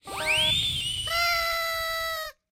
Get Up, Pierrot ATM notification
This is a sound I created for the first season of Get Up, Pierrot. It was used in one of the first episodes for an ATM sound and it has been our most used sound in the series so far! I made it by using a combination of different party blowers and layering them.
Welcome to "Get Up, Pierrot"
This is a "choose your own adventure" style animated series. Viewer participation is through IG polls. Simply watch the IG story, vote on the poll, and wait for the next animation to see the outcome. You'll want to turn on post notifications because you'll only have 24 hours from the release of each animation in which to vote. Once voting is closed check IG highlights for poll results.